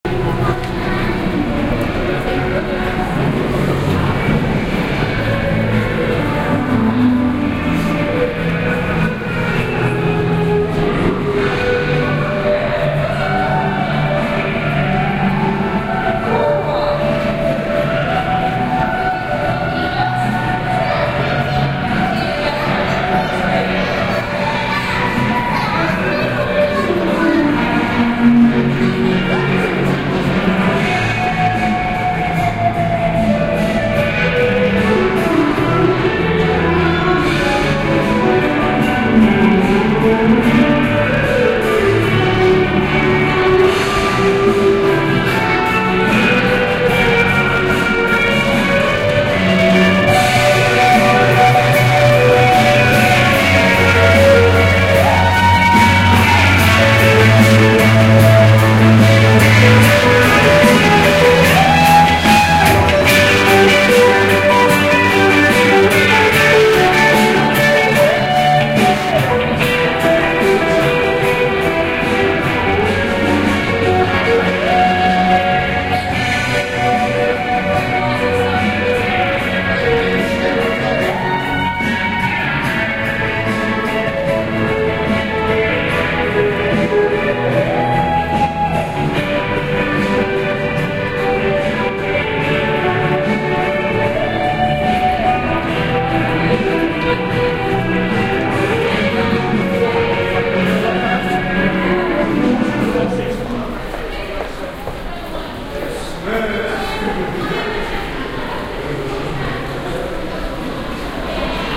South Kensington - Busker in station
london, ambience, soundscape, ambient, city, background-sound, ambiance, general-noise, atmosphere, field-recording